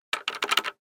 A Simple Button recorded with Zoom H6 In Studio Conditions Check out entire Buttons and levers pack!
select, sfx, switch